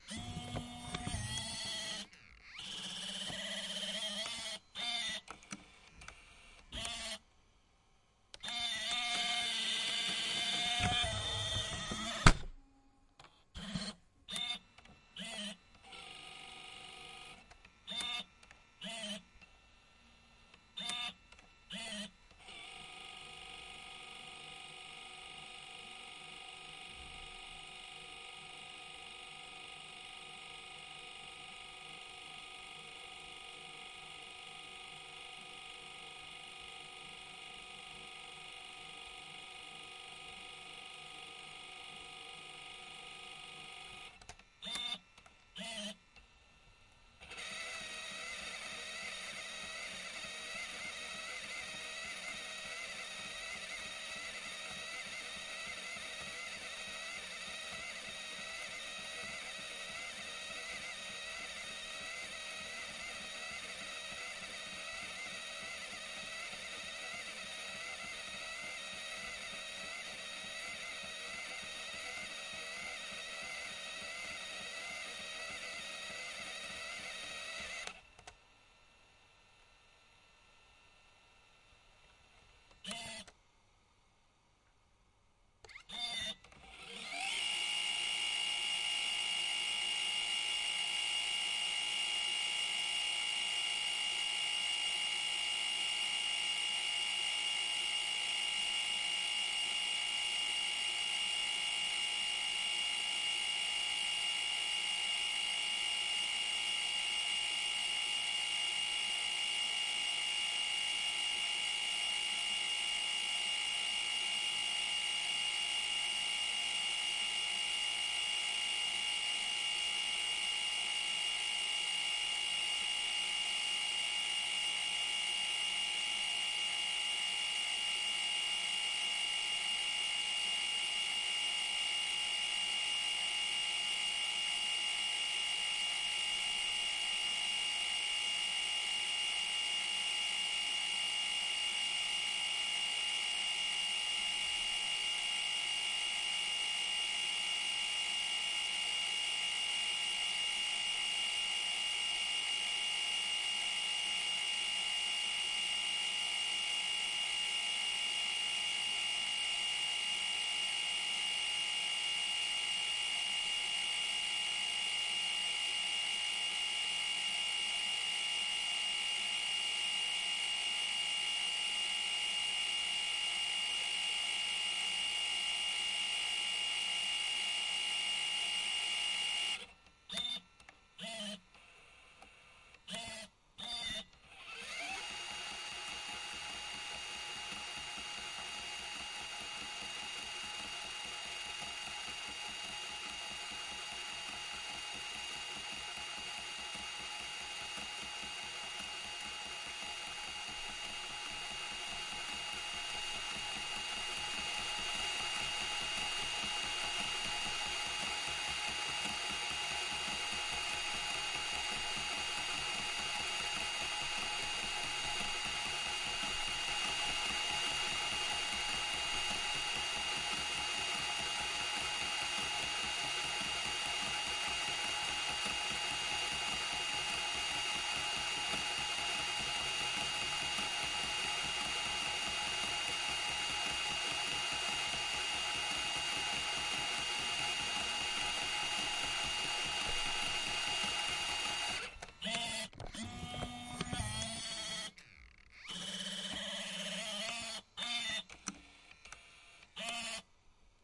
The sound of a working Sony CCD-TR55E Video8 Camcorder.
Recorded with Zoom H6.
8mm, 90s, button, cassette, click, digital, DV, eject, electric, fast, forward, heads, Hi8, loading, machine, mechanical, noise, pause, player, recorder, rewind, sony, stop, tape, television, TV, VCR, video8